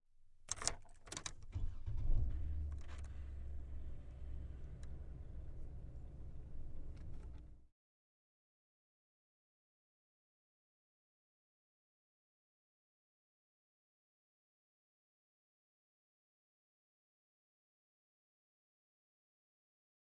Car starting up
car, ignition